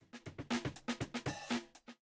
PART OF THE JACK DANIELS SAMPLE PACK. USED PREVIOUS SAMPLES TO MAKE A LOOP.PLAYED BY DRUMMER CALLED JACK DANIELS AND A FEW KITS TRIGGERING AN ANALOG SYNTH.
this is the moderate speed about 120 bpm.
drums, edge, loop, remix, samples